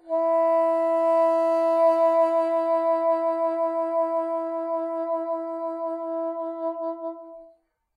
One-shot from Versilian Studios Chamber Orchestra 2: Community Edition sampling project.
Instrument family: Woodwinds
Instrument: Bassoon
Articulation: vibrato sustain
Note: E4
Midi note: 64
Midi velocity (center): 2141
Microphone: 2x Rode NT1-A
Performer: P. Sauter